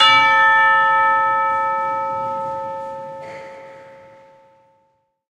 In this case we have managed to minimize audience spill. The mic was a Josephson e22 through a Millennia Media HV-3D preamp whilst the ambient partials were captured with two Josephson C617s through an NPNG preamp. Recorded to an Alesis HD24 then downloaded into Pro Tools. Final edit and processing in Cool Edit Pro.
alesis, arts, audio, avenue, bell, c617, canada, chime, chiming, church, e22, hanging, josephson, live, media, metal, millennia, npng, orchestral, percussion, pulsworks, ring, ringing, saskatchewan, saskatoon, third, tubular, united